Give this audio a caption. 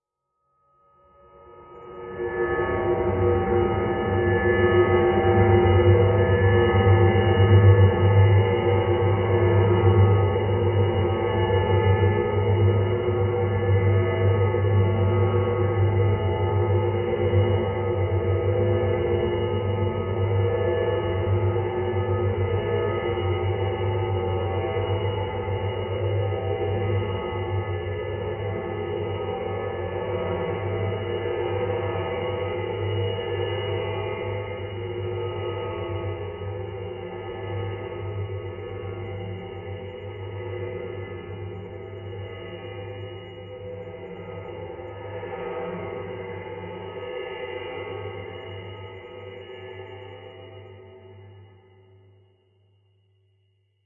rev spaceship drone full wet resample 2 - metallic
More metallic version of rev spaceship drone - recording of a saucepan lid span on a ceramic tiled floor, reversed and timestretched then convolved with reverb and a rhythmic fx sample.
All four samples designed to be layered/looped/eq'd as needed
fx, reverb, background, metallic, timestretched, processed, drone, grating, spaceship, space